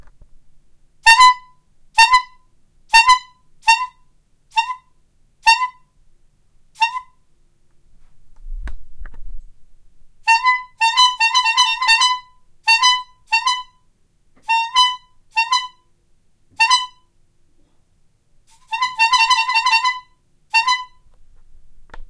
A recording of me tooting a bicycle horn.
bicycle, bike-horn, bulb-horn, cartoon, clown